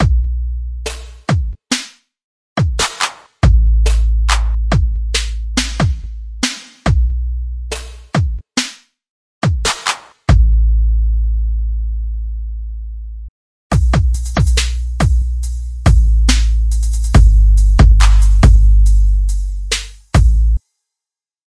trap intro and main beat
intro and main beat for you guys:)